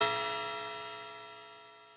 Hihat metallic
abl, hihat, drums, percussion, realism, pro, softsynth, tb-303